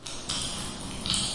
pasta salad mechanical
This is an edited video of someone mixing pasta salad to sound like either light rain or a sink of some sort dripping.